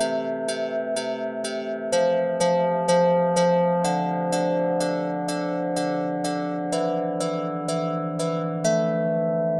TIG New Wave 125 MGuitar A
From a song in an upcoming release for Noise Collector's net label. I put them together in FL. Hope these are helpful, especialy the drum solo and breaks!
track, lead, new-wave, guitar, loop, 125bpm, acoustic, realistic